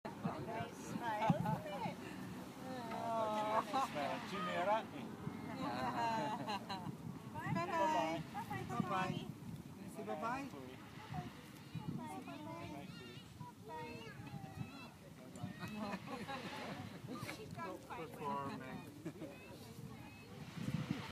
sound of the waves and chats
When I walking along the beach,I got charmed by the sound of waves and seagulls.People are talking and laughing,enjoy the nature.
people, nature, water